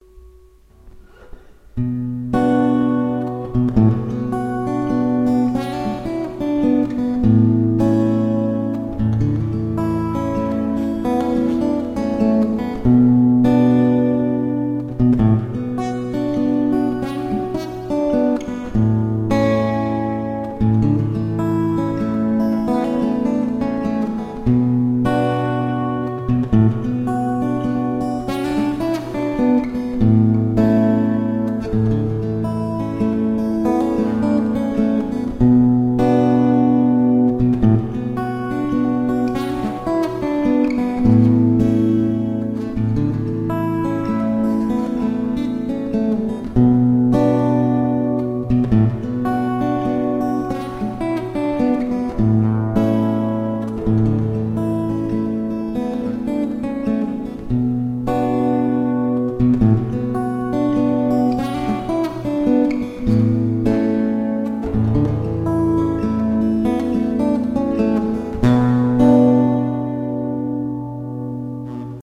Acoustic guitar in B minor - A major, played by surplus
instrumental, music, guitar, experimental, surplus, cleaner, improvised, acoustic, chords